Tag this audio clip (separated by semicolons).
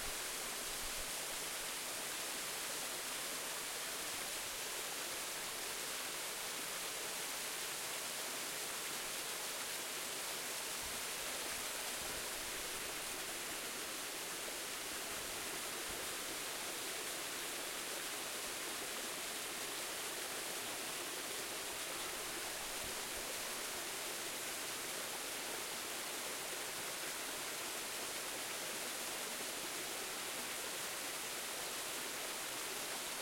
ambiance; ambience; ambient; field-recording; nature; river; stream; water; waterfall